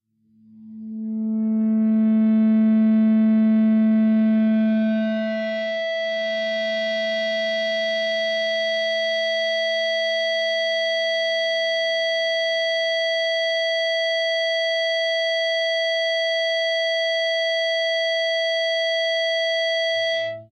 Dist Feedback A-5th str2
Feedback from the open A (5th) string.
miscellaneous, distortion, guitar